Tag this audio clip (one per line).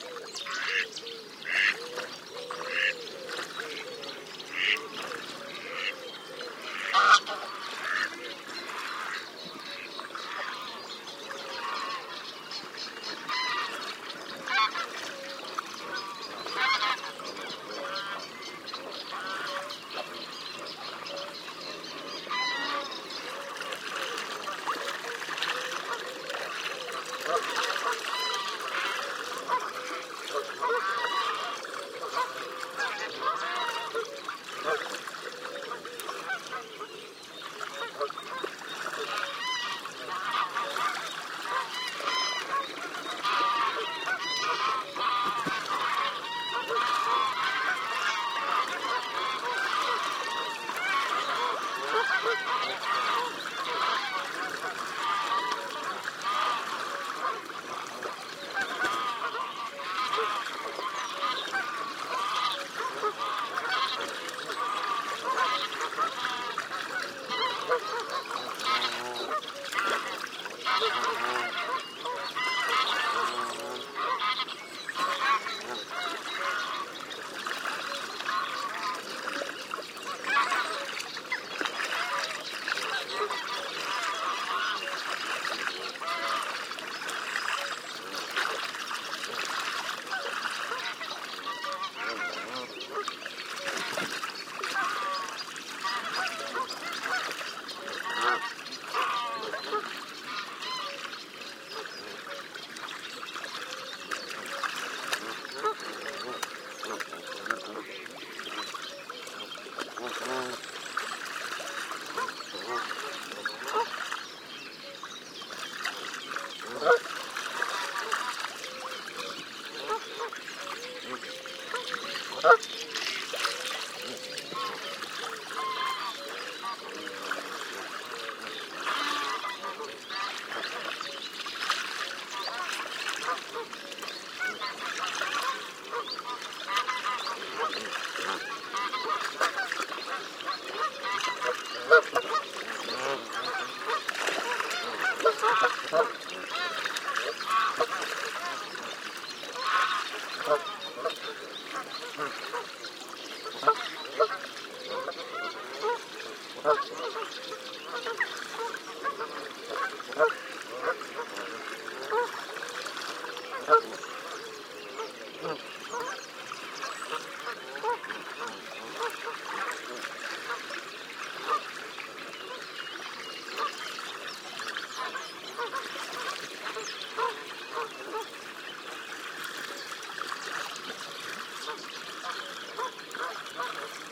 ambient
birds
field-recording
geese
goose
nature
reservoir
water